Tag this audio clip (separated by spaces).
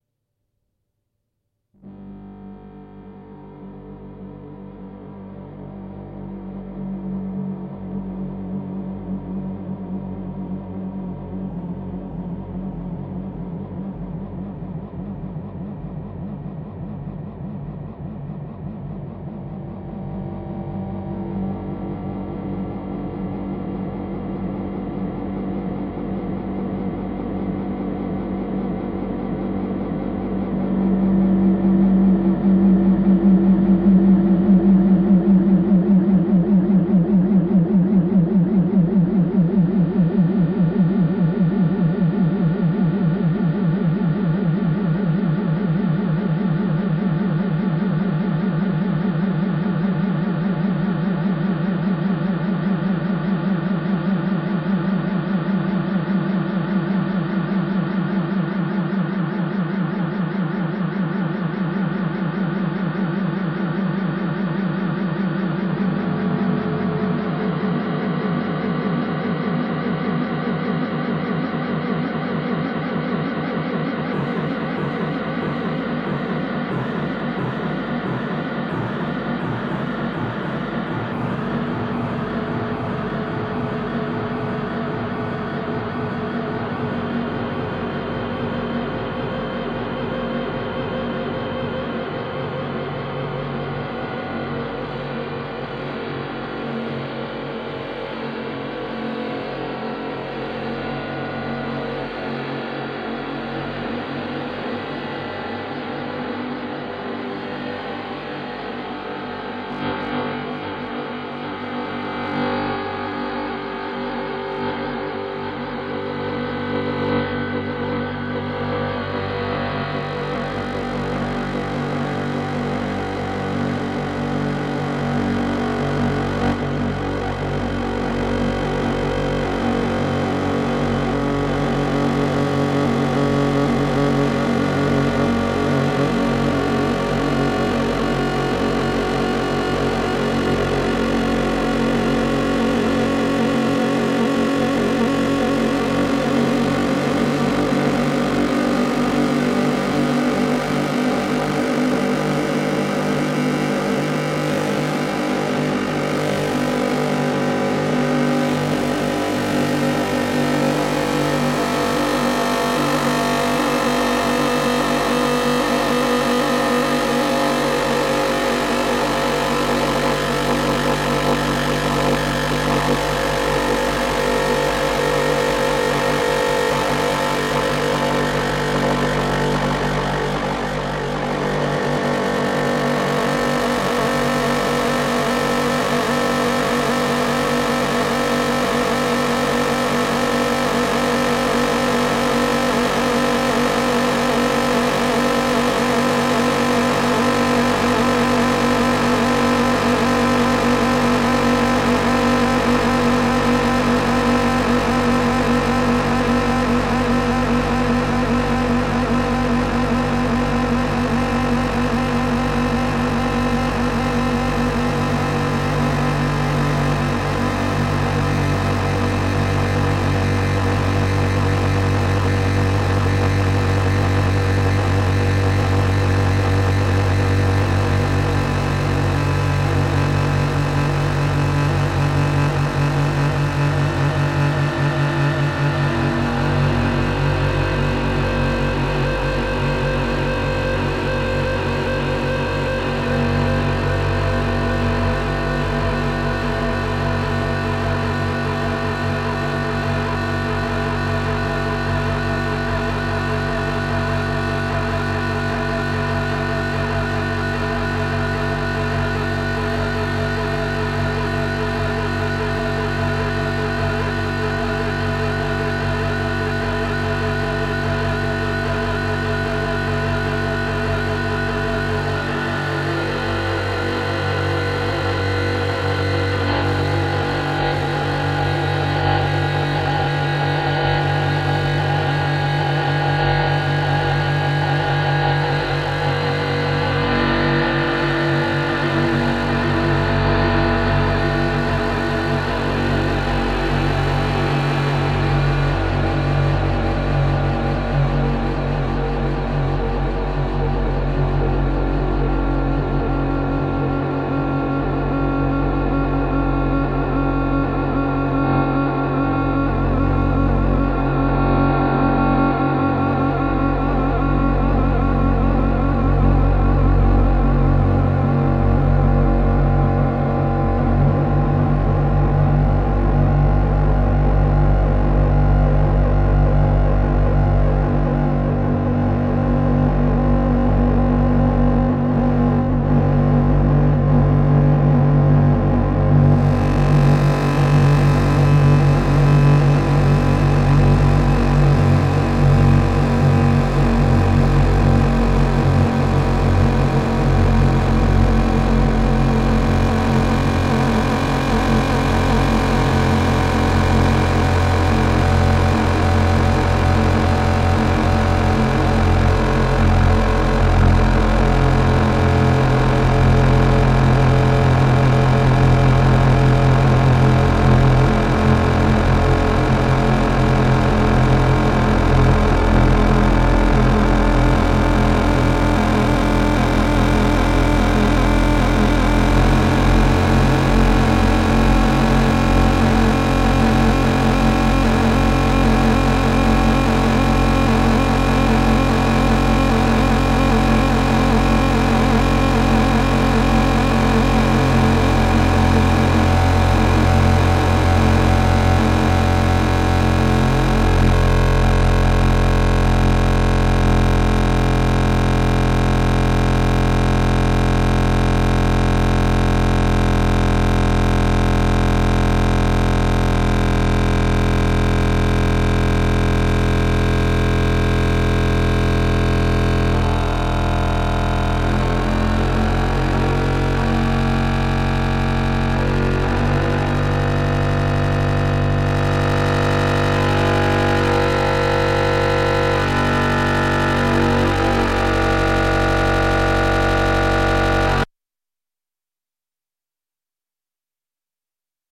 White-Noise Noise alien-sound-effects Future fx Futuristic Guitar-Pedals Sound-Effects Alien effects